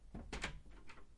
Recording the opening door sound
Door, Room